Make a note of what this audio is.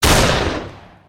Coachgun Fire2 dv
Coach gun fire2 distant variant
shoot, distant-variant, coachgun, weapon, shotgun, distant, blackpowder, fire, gun, coach-gun